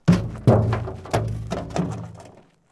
Empty metal oil barrel is kicked and it falls down
barrel, clang, metal, metallic, oil-barrel